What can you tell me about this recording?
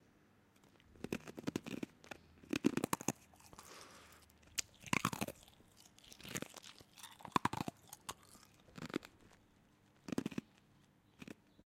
Ice Chewing Edited
This is of someone chewing on a piece of ice and slurping up the water as it melts in their mouth.
ASMR, Breaking, Chew, Chewing, Close-up, Cold, Crunch, Ice, lick, melting, Mouth, OWI, Slimy, Slurping, Spit, Swallowing, teeth, water